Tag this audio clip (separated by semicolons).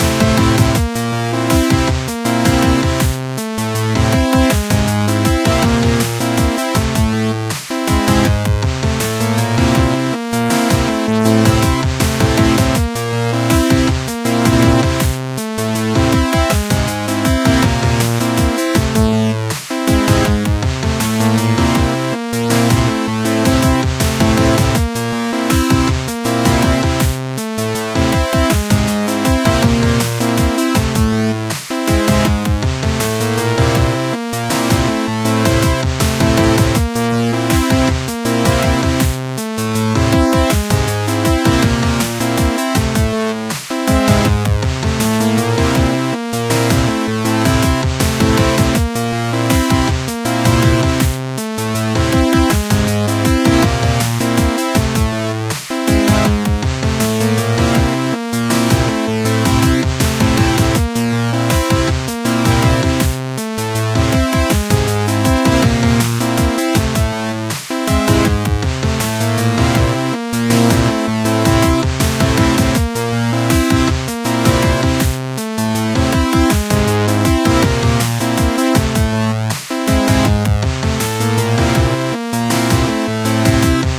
odd; strange; trippy; Weird